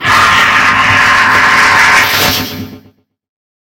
robot-scream
Scary, jumpscare